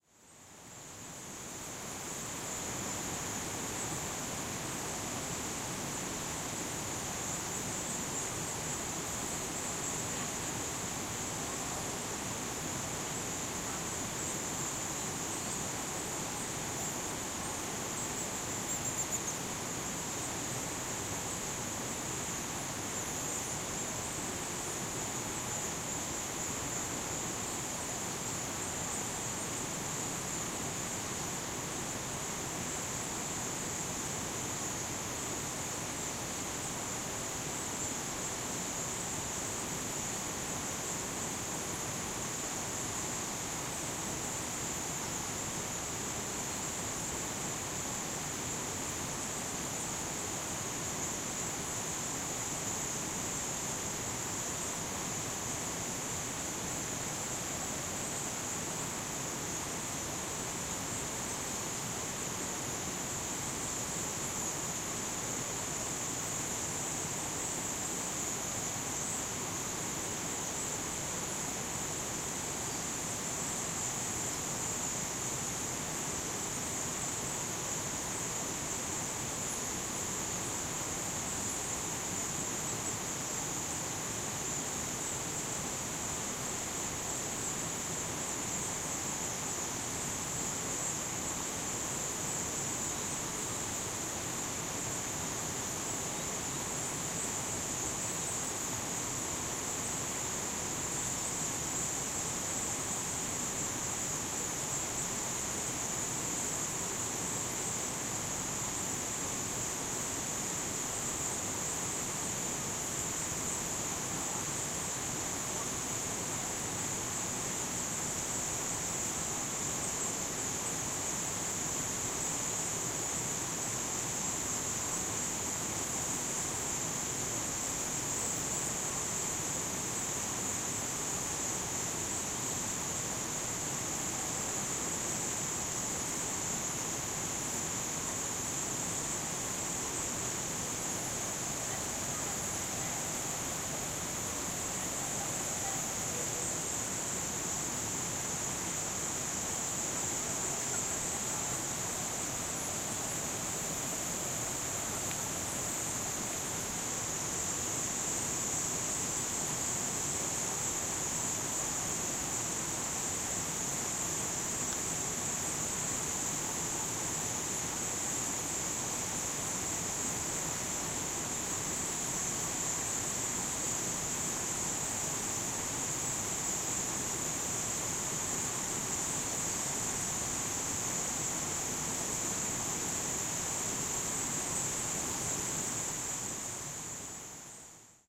Hundreds of thousands of birds flying into a cave in Chiang Mai at nightfall